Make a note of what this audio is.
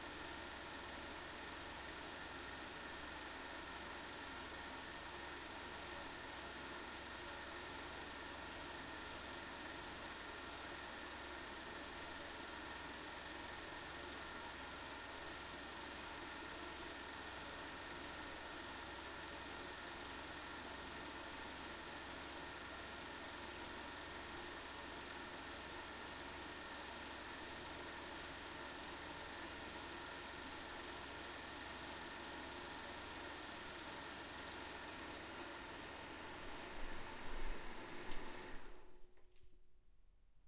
Super 8 mm projector distance stop
Sound recording of a real super8 mm projector from a distance
8mm, cinema, clean, film, movie, project, projector, reel, rhythm, s8, silent-film, super8